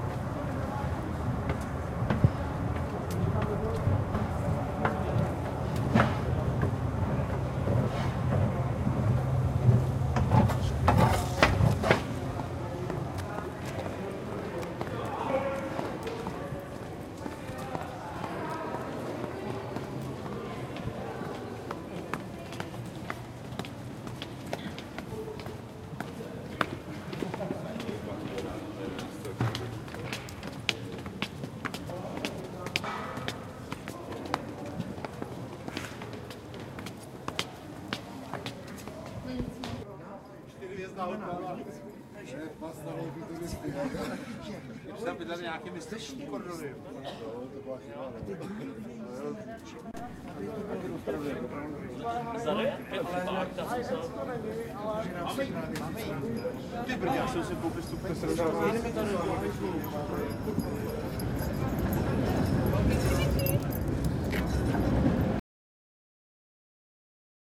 5-1 Street, people
people on the street